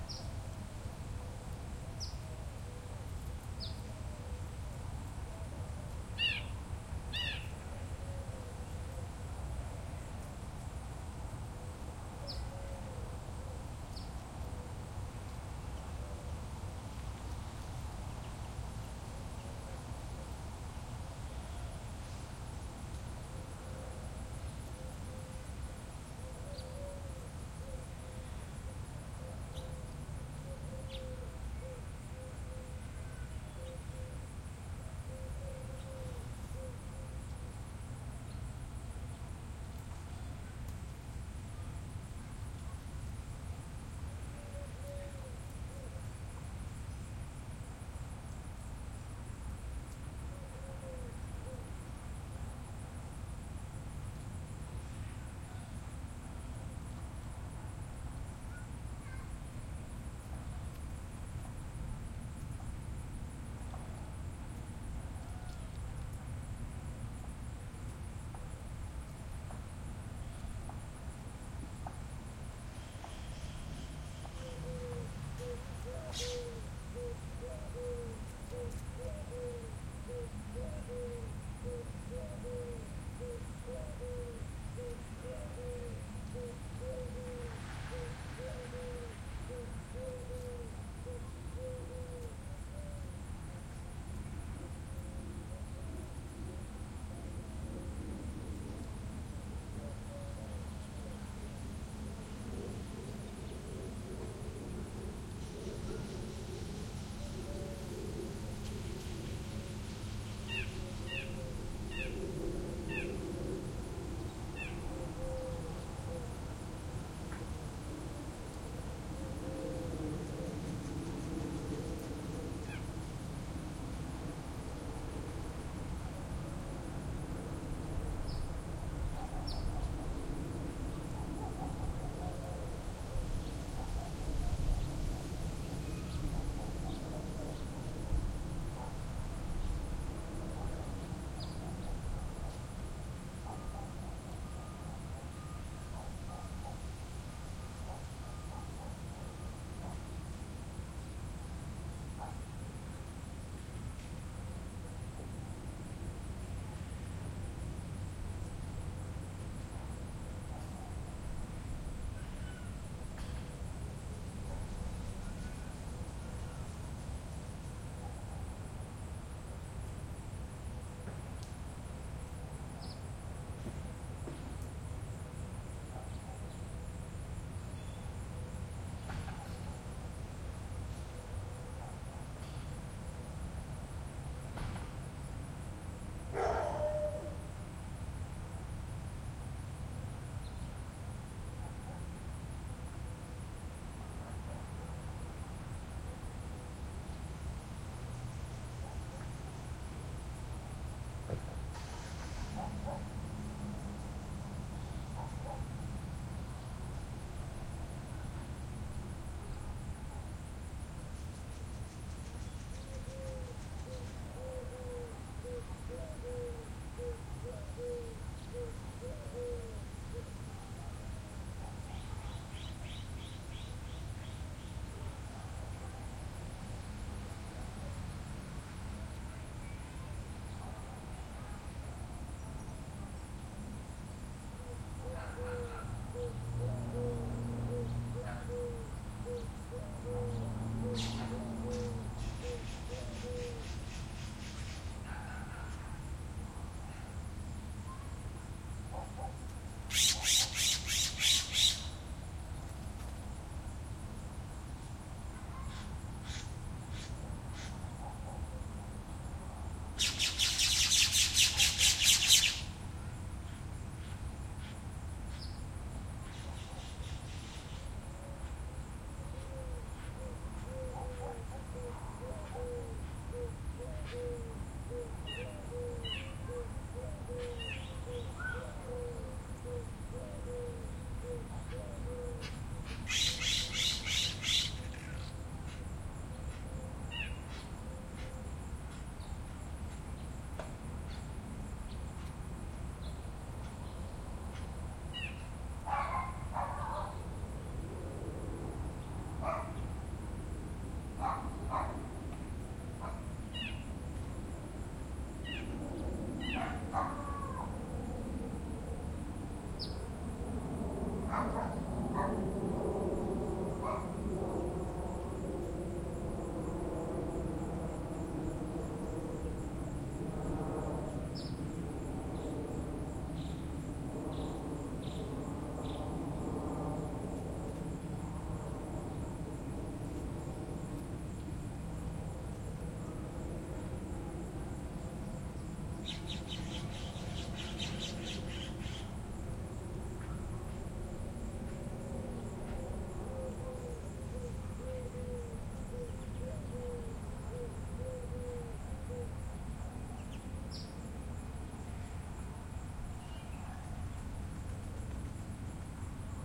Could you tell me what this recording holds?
cemetery park backyard garden morning quiet crickets birds owls heavy skyline
backyard, cemetery, crickets, garden, morning, park, quiet